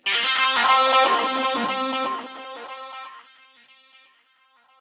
Another unusual but good guitar sound. A hard guitar sound I made with my Strat - heavily processed with reverb, delay, and a Hi-pass sweep filter